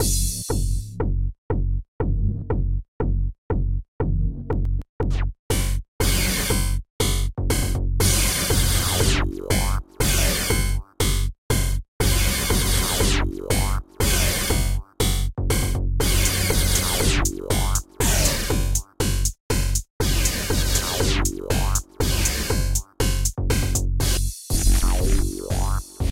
Metallic Beat 1
A complex beat that sounds metallic.